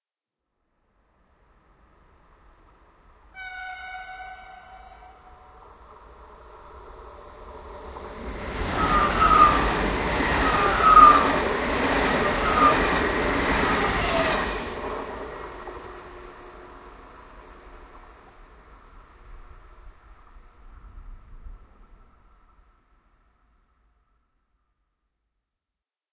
Super city express train "Pendolino"
mikro 2xRODE MT-5, XY stereo, M-Audiou card-recorder